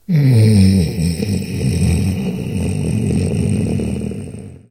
Zombie growl grunt
A zombie is growling.